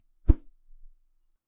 Just a sound i made by waving a drumstick infront of my mic.